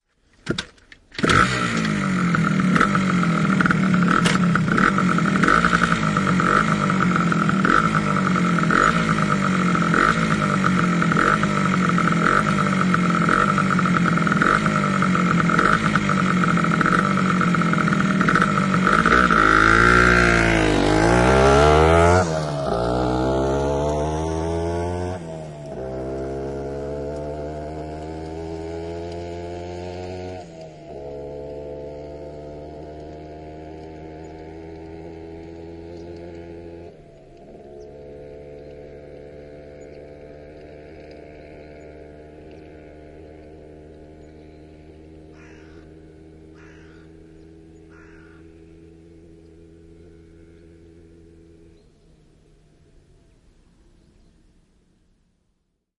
Moottoripyörä, lähtö asfaltilla, kaasutus / A motorbike, start, revving, pulling away on asphalt, Yamaha 125 cm3
Yamaha 125 cm3. Käynnistys polkimella, kaasutusta, lähtö, etääntyy.
Paikka/Place: Suomi / Finland / Nummela
Aika/Date: 05.10.1975